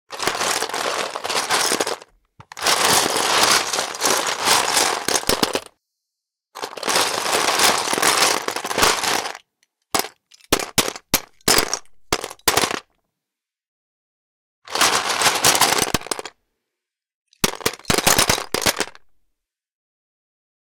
Searching a LEGO box
Searching LEGO bricks in a box.
{"fr":"Fouiller une boîte de LEGO","desc":"Fouiller dans une boîte de LEGO.","tags":"lego briques boite fouiller chercher"}